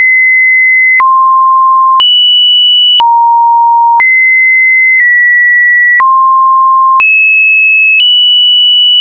tmp3jtgoi9 (Webdriver Torso)
9 random high-pitched sinewave tones generated in Audacity.